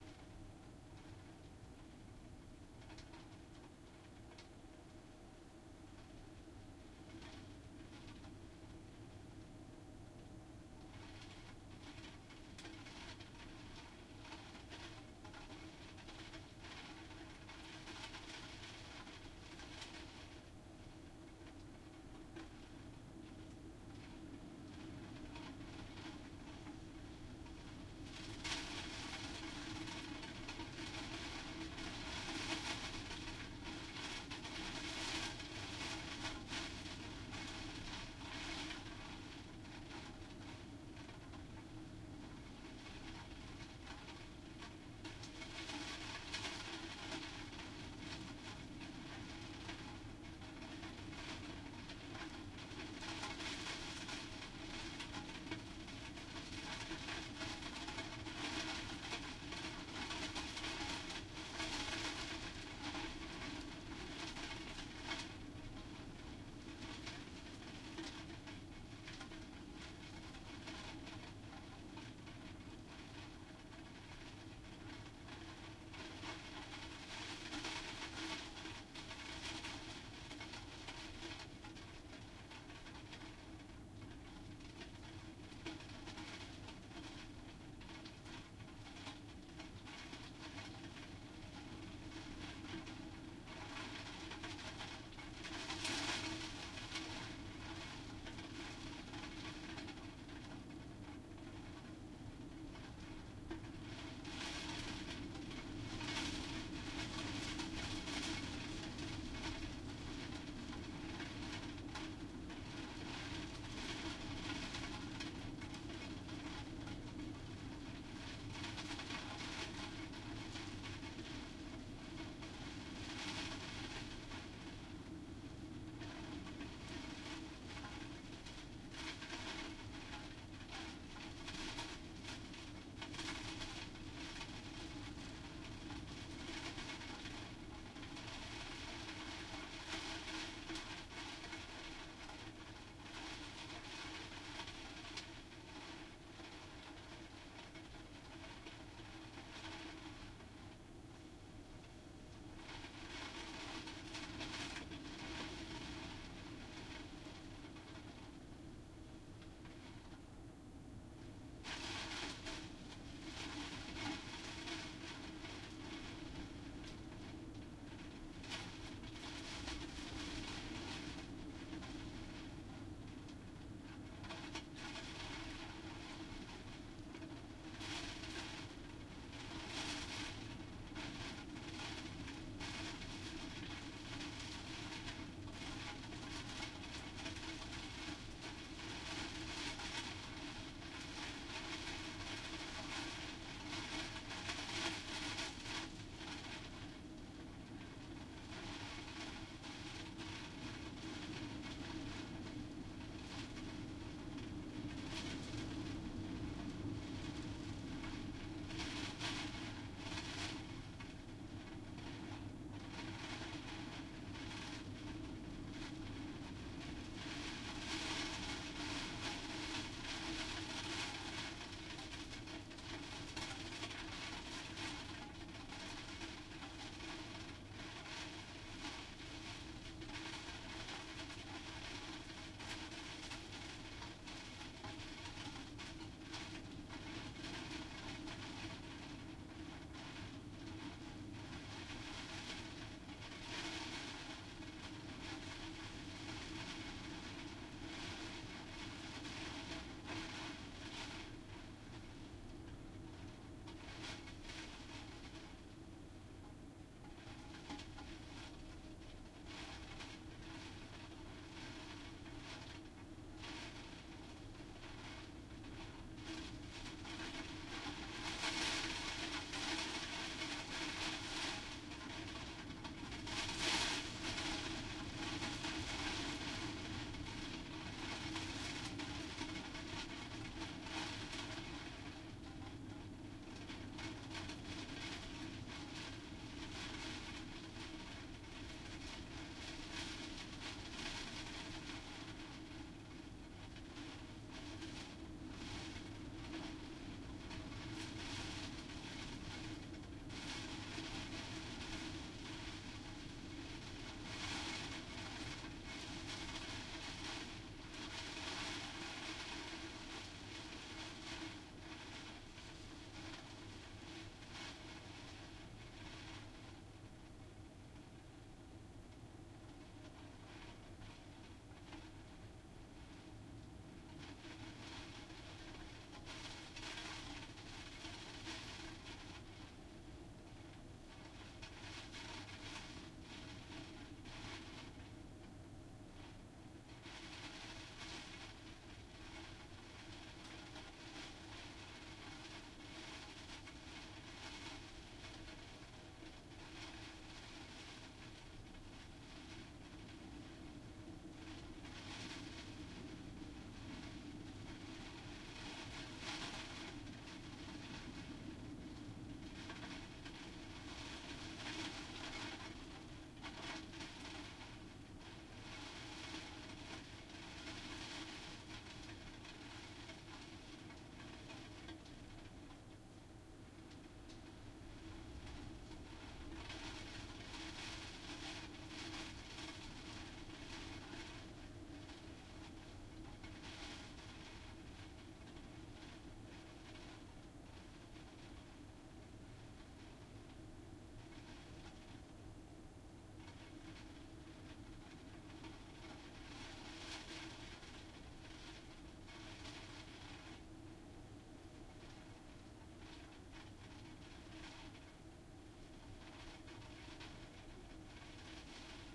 Rain and storm from behind window 2012-1-2
I recorded the sound of bad weather against one of the windows in my house. It's a typical fall/winter atmosphere here. Kind of gives the feeling of being inside where it's warm and cosy.
Recorded with a Zoom H2.
rain, wind, window, weather, behind, blowing, blow, raining, atmosphere, building, inside, behind-window, zoom-H2, storm, house